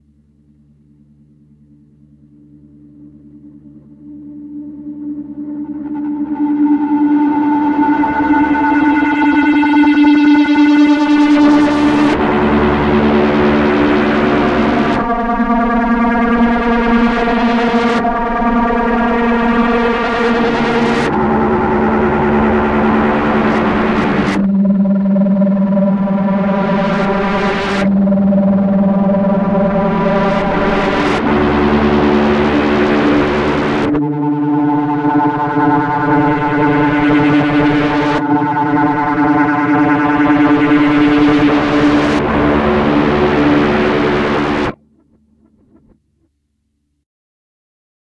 reverse phase quantum
A reversed piece heavy on the phaser. Think of receiving a sound from space only to realize the sound is playing backwards.
ambient, crazy, delay, echo, phase, quantum, reverb, reverse, space